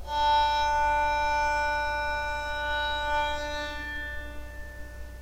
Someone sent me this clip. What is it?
Tenor Nyla D5
A bowed banjo from my "Not so exotic instruments" sample pack. It's BORN to be used with your compositions, and with FL Studio. Use with care! Bowed with a violin bow. Makes me think of kitties with peppermint claws.
Use for background chords and drones.
calm, serene, violin-bow, bowed, puffball, varazdinpeppermint, banjo